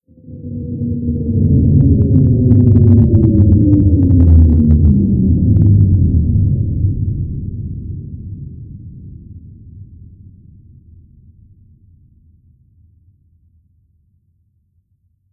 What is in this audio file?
Sound of a huge machine powering down, or a large spaceship landing or descending, with a bit of a reverb tail.